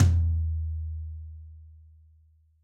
Breathing Tom 4
This is a free one hit sampler of my "Breathing" drum kit samples. Created for one of my video tutorials.
Breathing, drum, samples, tom